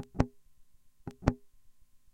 loop guit glitch 2
This sample pack are the result of an afternoon of experimentation
engraved with a MPC 1000, is an old guitar with the pedal Behringer Echo Machine, I hope you find it useful
Este pack de muestras, son el resultado de una tarde de experimentación
grabado con una MPC 1000, es una vieja guitarra con el pedal Echo Machine de Behringer, espero que os sea de utilidad
echomachine, MPC